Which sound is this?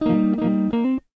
Tape El Guitar 43
Lo-fi tape samples at your disposal.